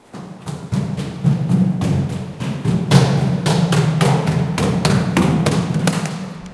SonicSnap JPPT5 RunningStairs
Sounds recorded at Colégio João Paulo II school, Braga, Portugal.
Joao-Paulo-II, Portugal, running, stairs